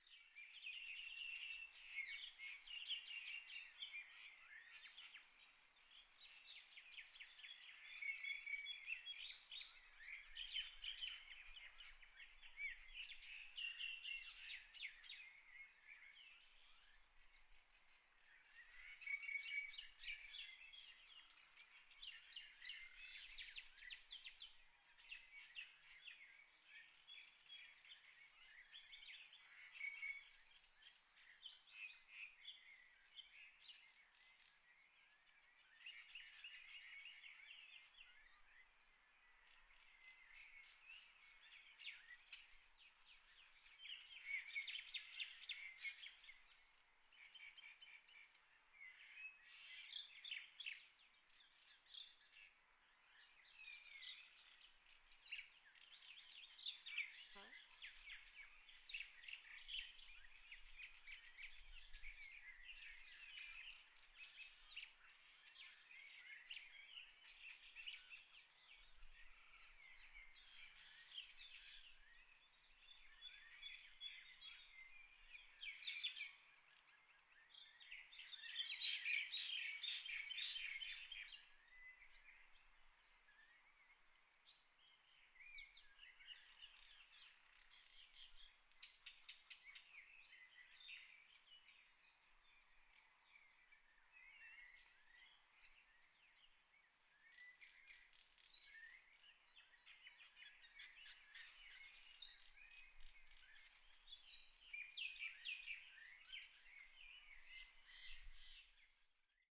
5.00am recording of the birds chirping at the front of my house on a Zoom H4n
A small fart occurs at 0:56 (sorry about that!)
Australia, birds, birds-chirping, chirping, field-recording, Victoria